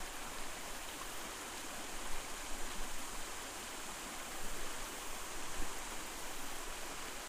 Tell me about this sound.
A shorter version of the 'River Water by Field -LONG-' clip, this one is still seamless and loops perfectly.
Very clear recording of river water flowing with birds and crickets in the backround.
Recorded with a H4 Handy Recorder on site in Komoka, Ontario, Canada, directly on the Thames River.